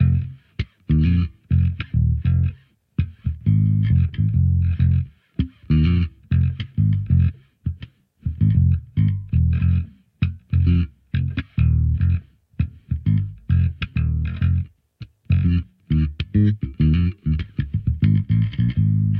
Fminor Funk BassGroove 100bpm
F Minor Funk Bass Groove
70, Ableton-Bass, Ableton-Loop, Bass, Bass-Groove, Bass-Loop, Bass-Recording, Bass-Sample, Bass-Samples, Beat, BPM, Compressor, DR-Strings, Drums, Fender-Jazz-Bass, Fender-PBass, Funk, Funk-Bass, Funky-Bass-Loop, Groove, Hip-Hop, Jazz-Bass, Logic-Loop, Loop, Loop-Bass, New-Bass, s, s-Jazz-Bass, Soul